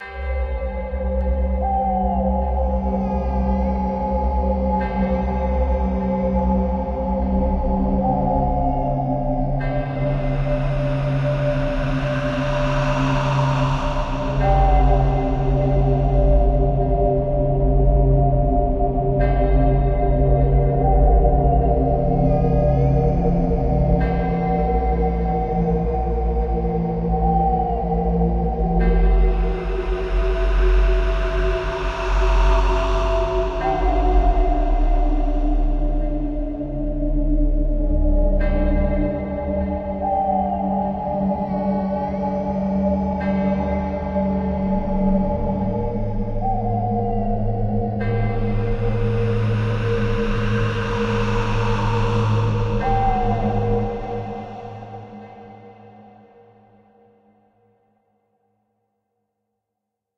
Scary night
Mysterious night at Halloween. Clocks and scary noises
dark, film, halloween, movie, night, Scary